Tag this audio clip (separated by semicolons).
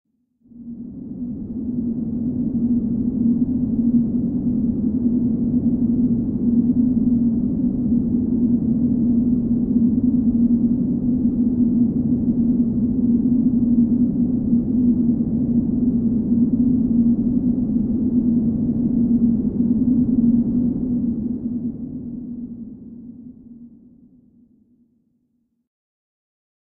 ambient; Analog; drone; evolving; Mopho; soundscape